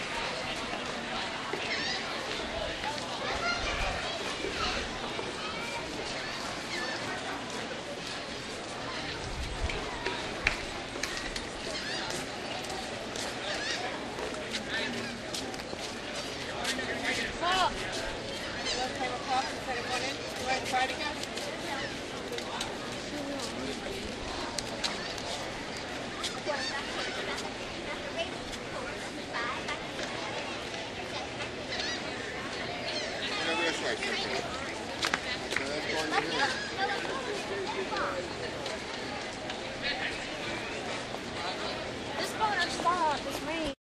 newjersey OC musicpier front
Out front of the music pier on the boardwalk in Ocean City recorded with DS-40 and edited and Wavoaur.